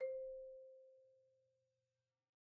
Sample Information:
Instrument: Marimba
Technique: Hit (Standard Mallets)
Dynamic: mf
Note: C5 (MIDI Note 72)
RR Nr.: 1
Mic Pos.: Main/Mids
Sampled hit of a marimba in a concert hall, using a stereo pair of Rode NT1-A's used as mid mics.
hit, mallet, one-shot, organic, percs